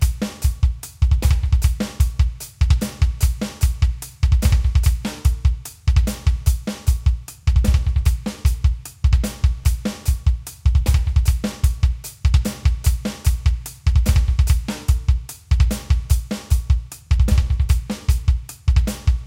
Drum pattern in the fashion of industrial metal